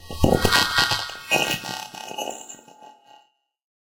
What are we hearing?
Noise reduction-like timbre with an initial attack and heavily processed decay tail before two further echoes. A further processed version of "Single Hit 1"